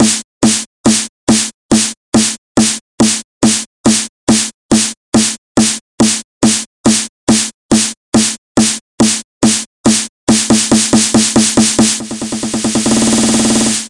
Snare Build 140 BPM (Hands Up)
Just a little Snare Build Up for Hands Up Tracks. Made in FL Studio 11.
Loop, HandsUp, Dance, FX, Techno, Percussion